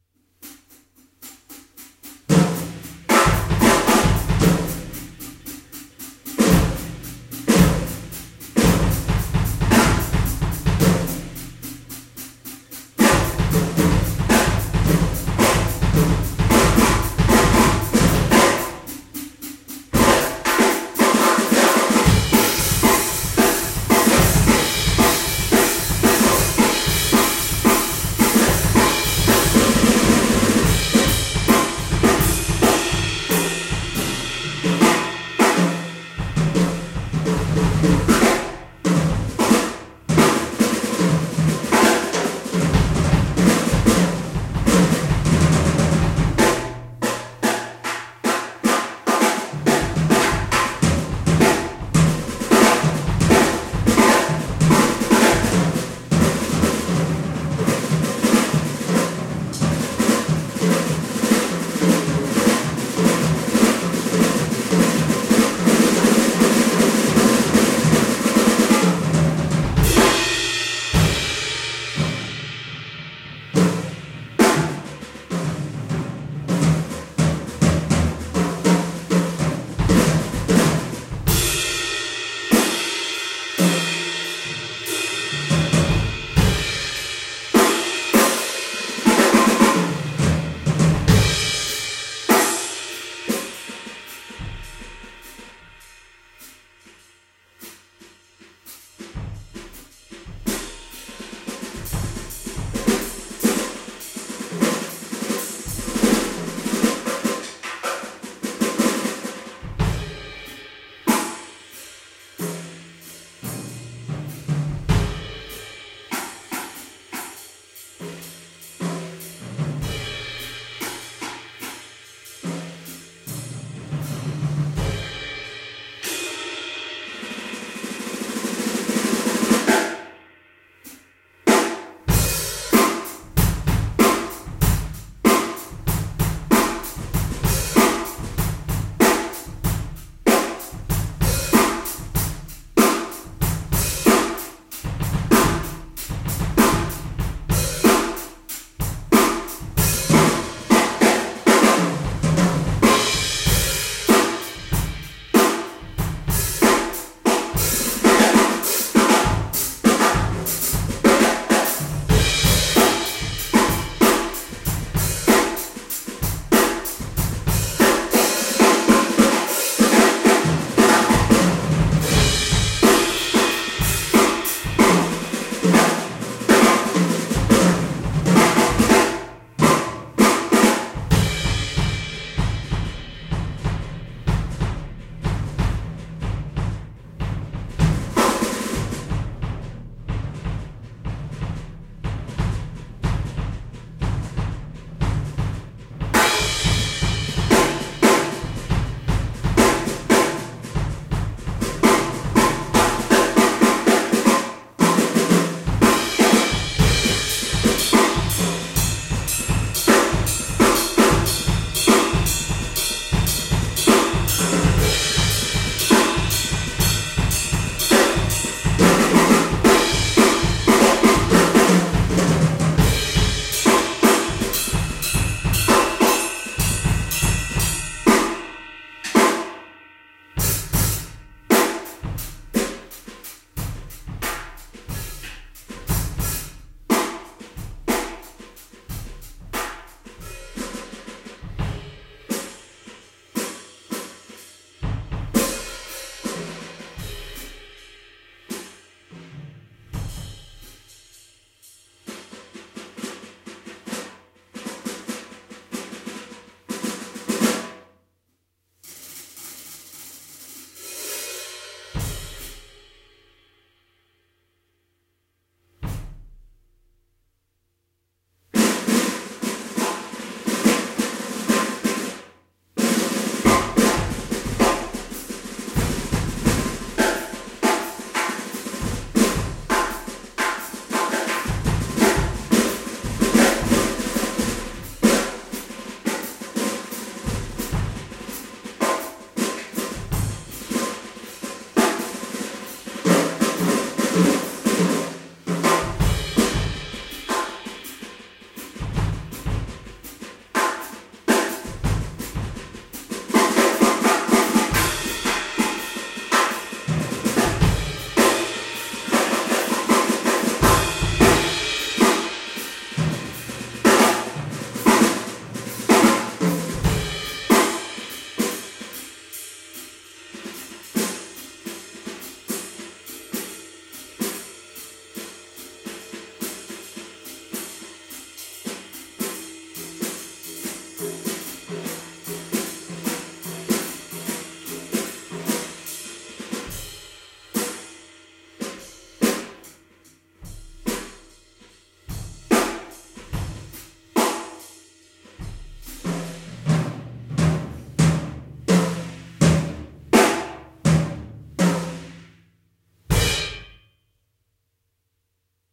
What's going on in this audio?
Drum Solo
Recorded my drums in a big room with my notebook mic, and processed with Audacity to get a better sound.
loop, drums, drum-loop, solo, drum, groovy, percussion, beat